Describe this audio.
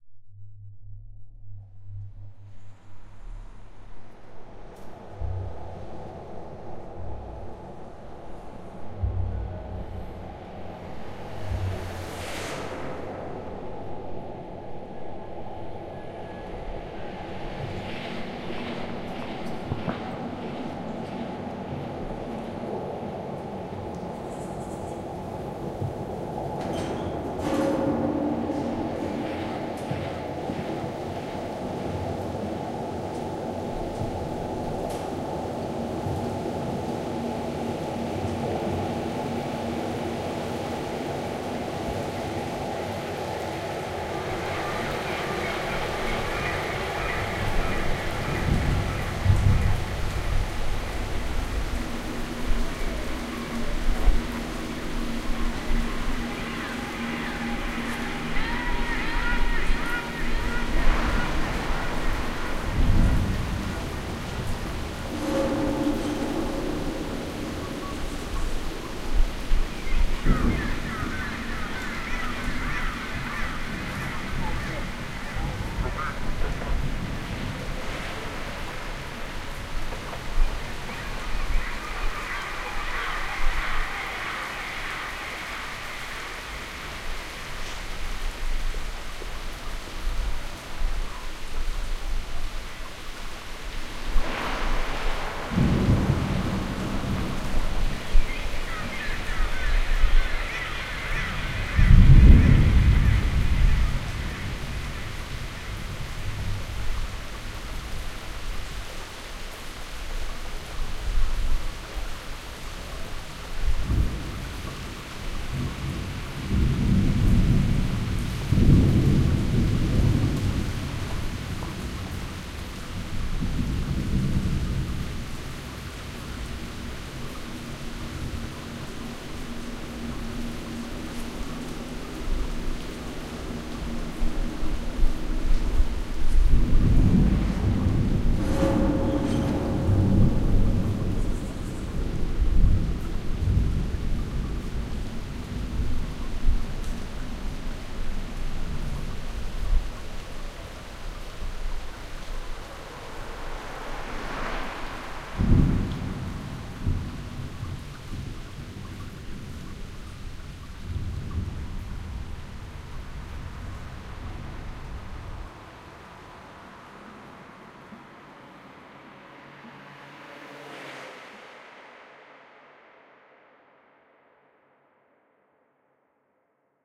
Dark background ambience
Background ambience made in Cubase combining a lot of different processed recordings.